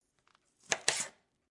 cutting an orange on a table